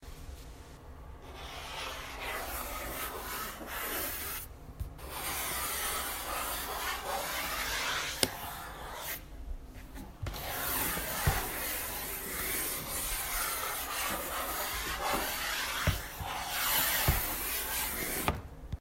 Drawing A Line
I quickly recorded this for a motion graphics project. It was used as the sound of line on a graph drawing out.
graph, continuous, marking, drawing, line, dragging, pencil, finger, scoring, marker